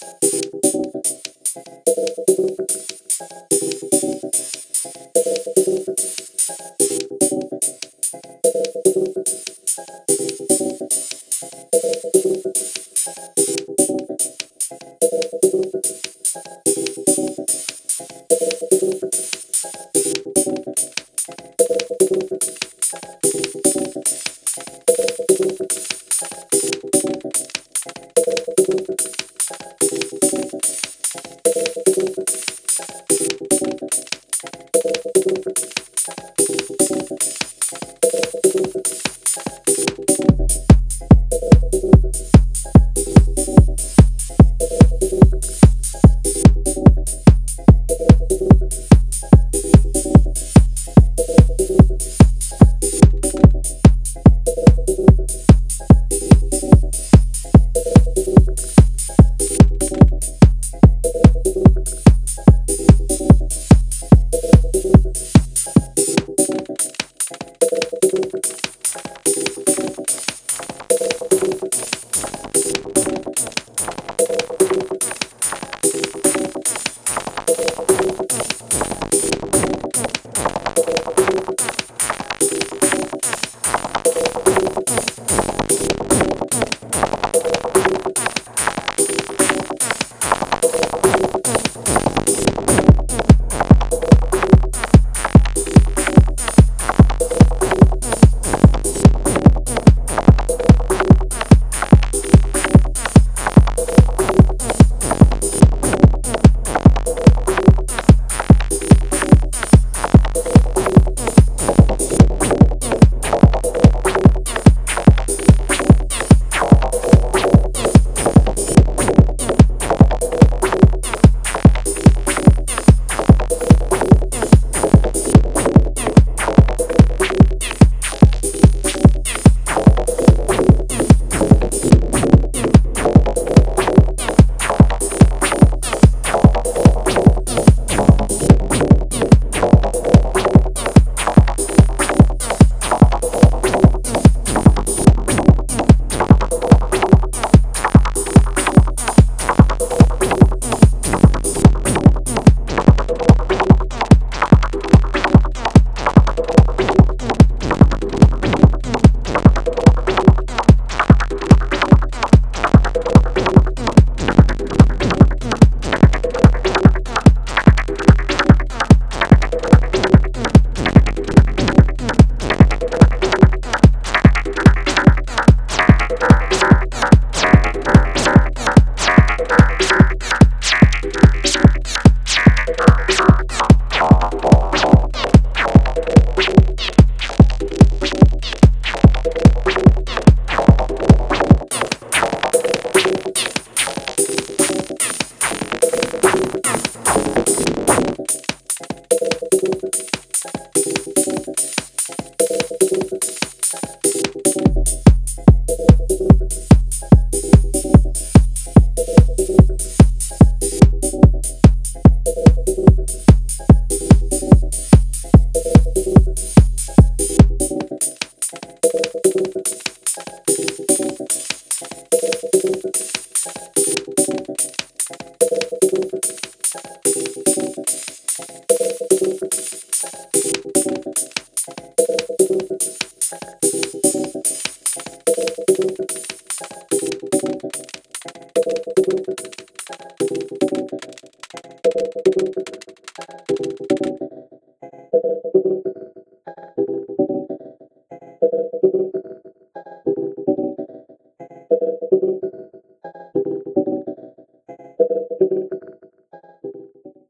phased delay hat
beat, dance, digital, drum, electronic, loop, modular, synth, synthesizer, techno